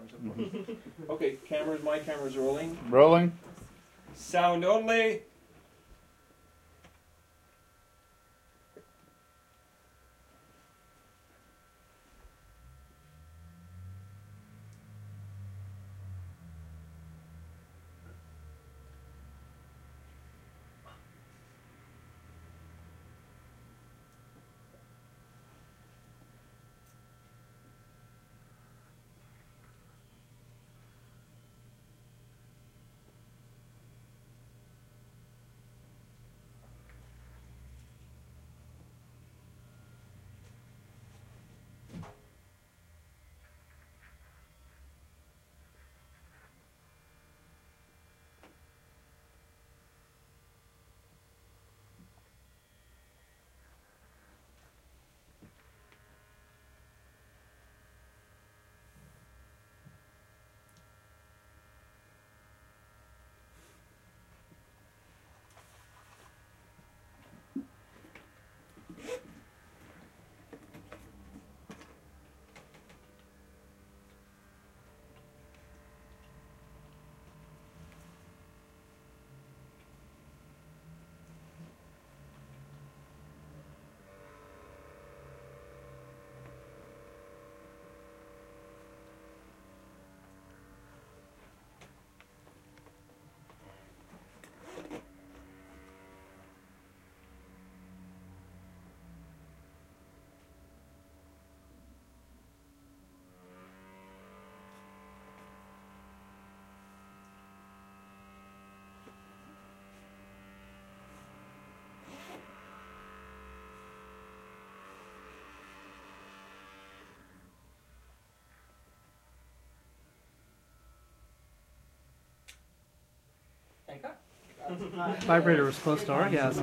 Raw audio file (as recorded on set) of a vibrator (sex toy) buzzing and vibrating. Long, deep buzz.